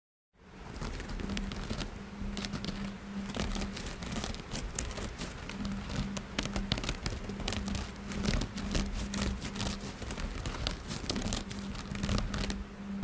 Scratching Noise
A repetitive quiet scratching sound, also possibly could be used for a digging sound; you be the judge.
tearing, claw, dig, animal, digging, scratching